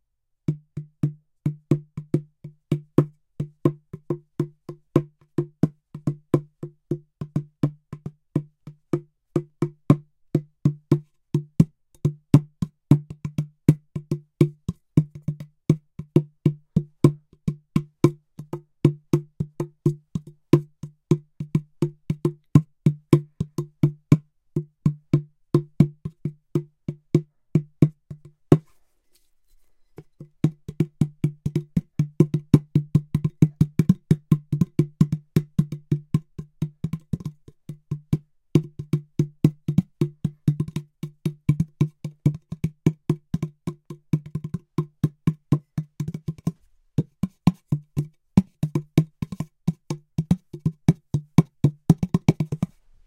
bongo thing short
short sample of a bongo like device sounds with various drum patterns
bongos, rhythm, percussion, drum